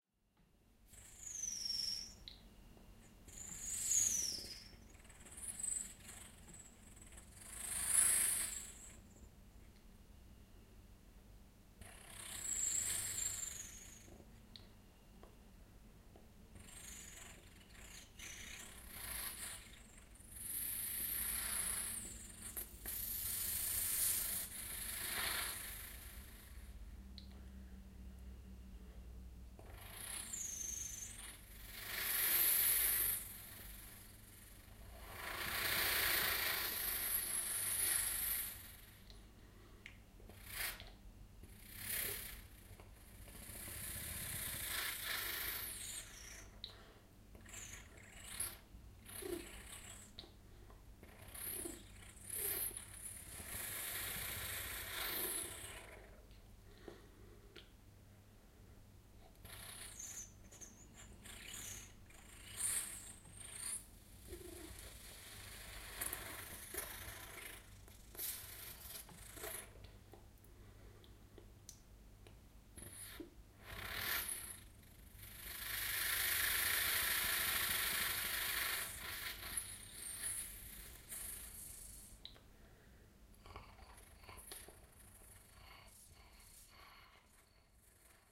voice bird
Strange voices recording on Zoom H2.